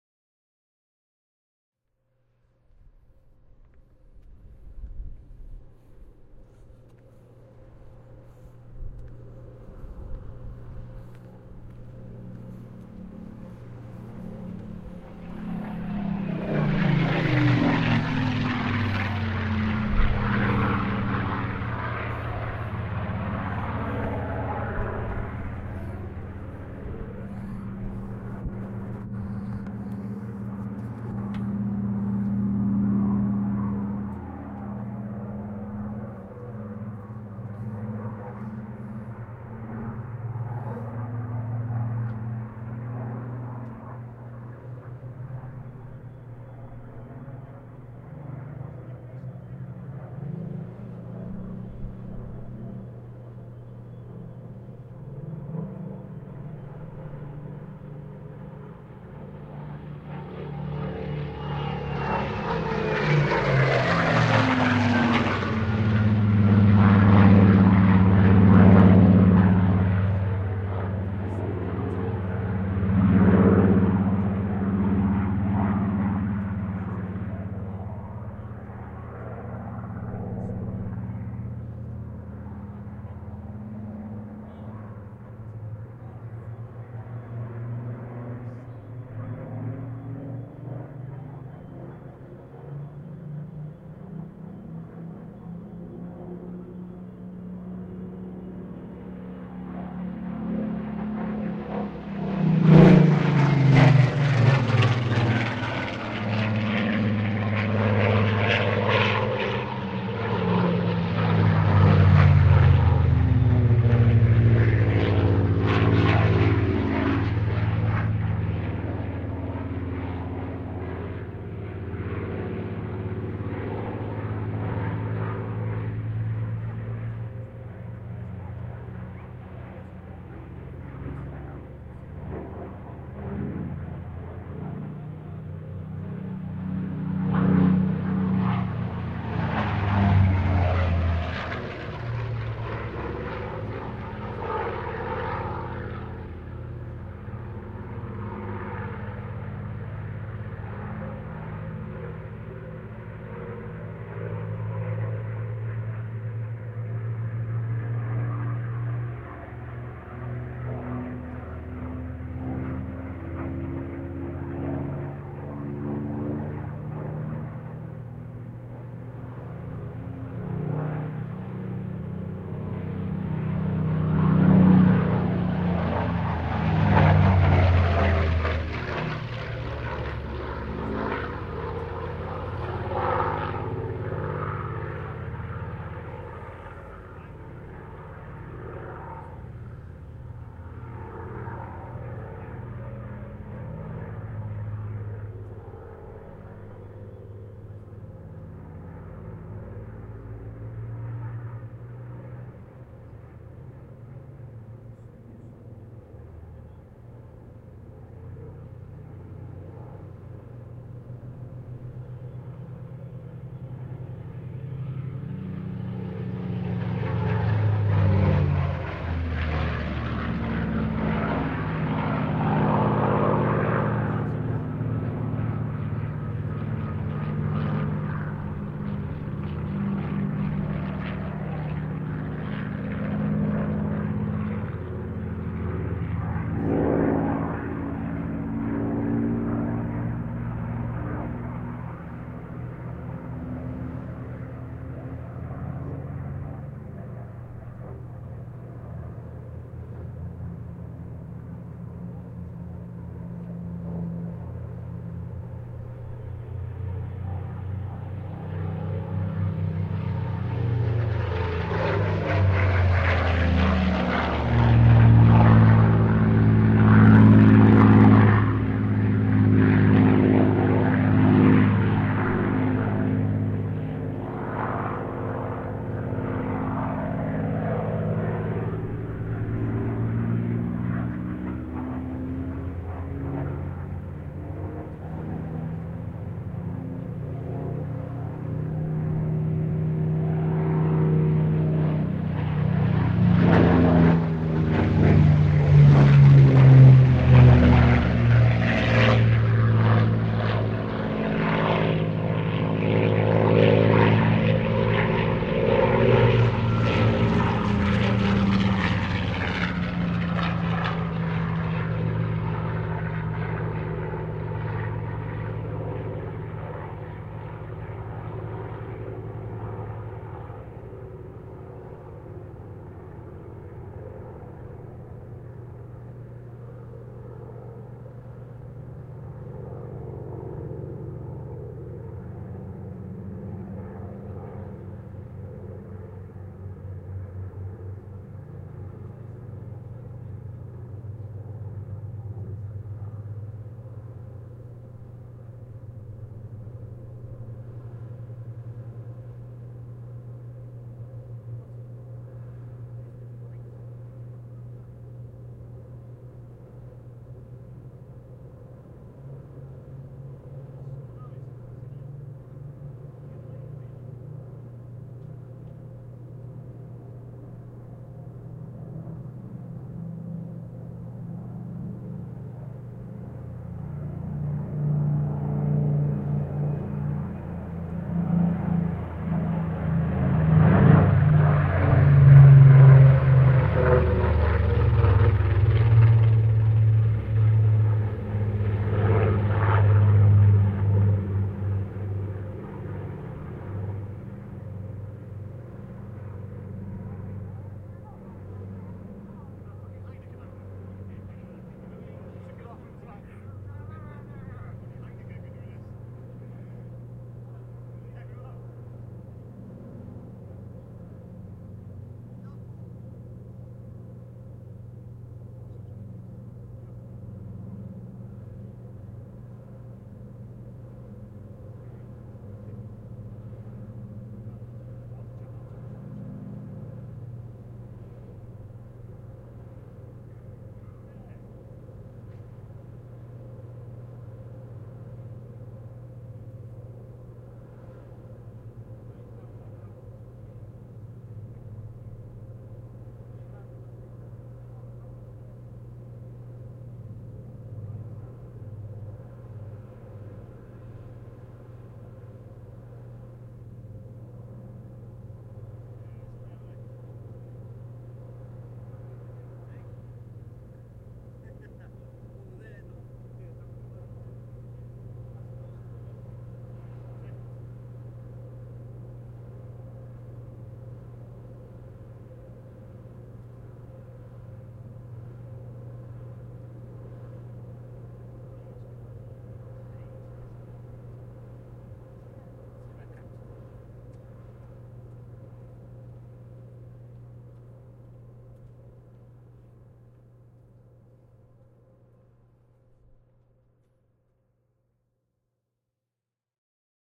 One of the most iconic sounds of all time that people enjoy. The sound of a powerful Merlin's and Griffon engines by Rolls-Royce, great sound to mix into films
soundscape, mix some bombs and guns blasting The aircraft come in for a day show off at Anglesey... have fun. Would be nice to hear what you come up with.